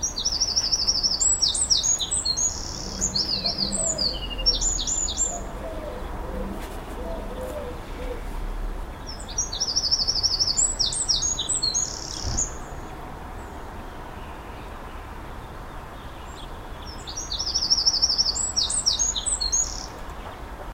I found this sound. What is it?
A wren singing in my garden March 1st 2007. There is a certain amount of background and traffic noise - no processing has been done. Recorded on minidisc.
bird, bird-song, field-recording, wren